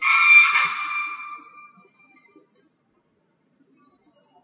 Gnashing of metal
sound from downtown of the Kyiv, Ukraine
foley, grinding, INDUSTRIAL